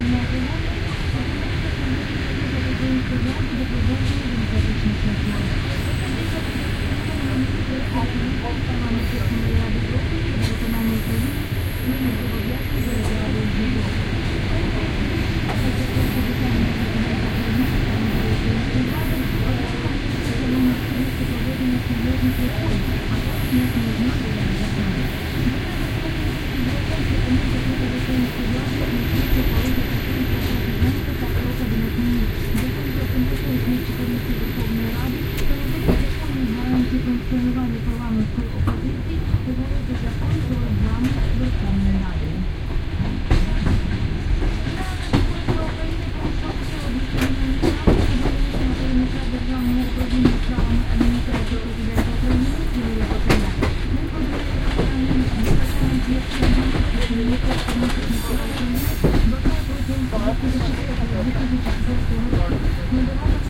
11-train-to-donetsk-looking-out-window
Looking out the window of a train going from Kiev to Donetsk. You can hear the wheels squeeking against the tracks. And a little bit of the typical klok-klok sound you can hear in trains. A radio plays in the background and doors bang shut. I was looking perpendicular to the train, so you can hear the stereo of sounds coming from left to right.
train, wheels, field-recording, ukraine, inside, typical